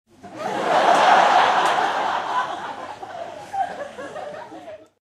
LaughLaugh in medium theatreRecorded with MD and Sony mic, above the people
prague, audience, laugh, crowd, theatre, auditorium, czech